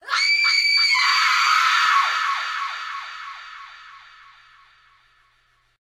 Scream (with echo)
A scream I used to end my short film
terror, scary, effect, fear, horror, scream